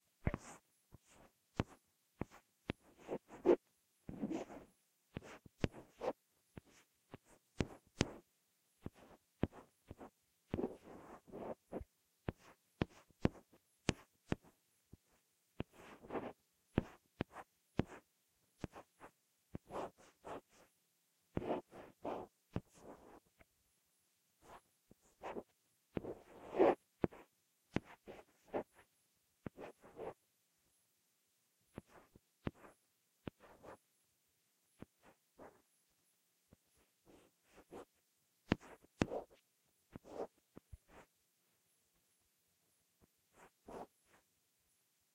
Writing the letters of the alphabet on a chalk board.